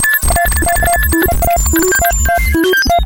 bad telecommunications like sounds.. overloads, chaos, crashes, puting.. the same method used for my "FutuRetroComputing" pack : a few selfmade vsti patches, highly processed with lots of virtual digital gear (transverb, heizenbox, robobear, cyclotron ...) producing some "clash" between analog and digital sounds(part of a pack of 12 samples)